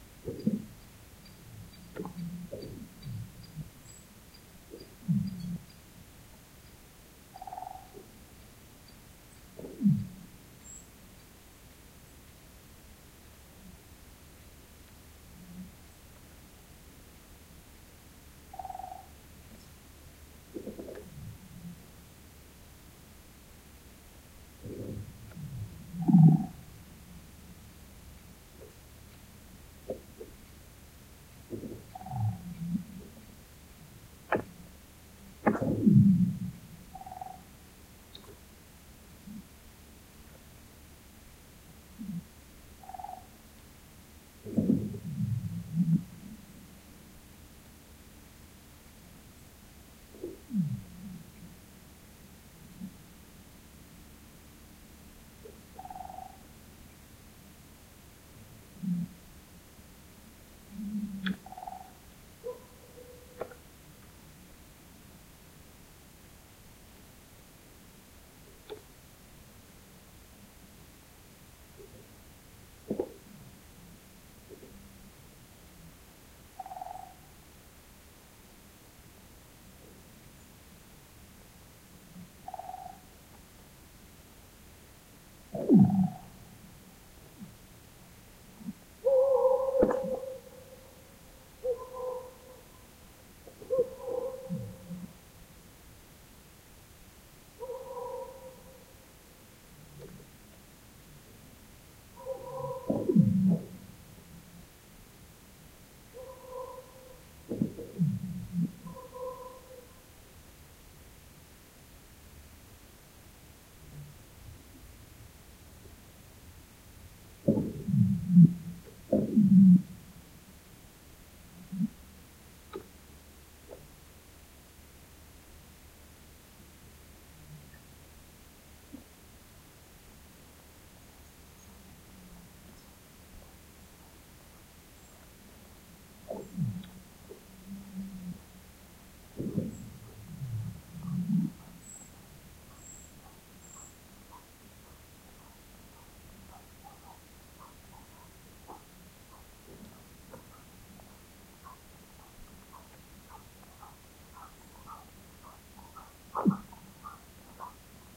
Frozen lake making sounds as it's freezing, some birds (woodpecker and something else) and a dog can be heard.